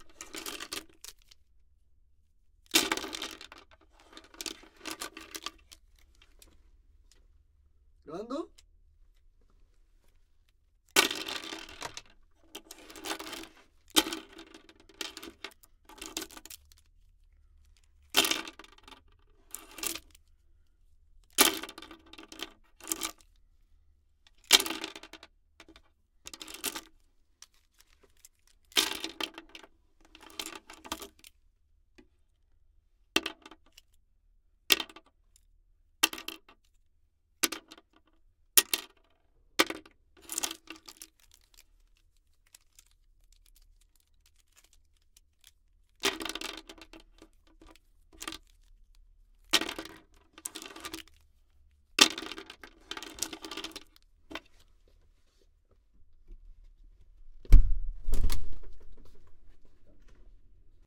Lapices caen sobre mesa
pencils fall on the table
pen, table, lapiz, fall